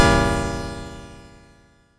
Generated KLSTRBAS 6
Generated with KLSTRBAS in Audacity.
impact, wave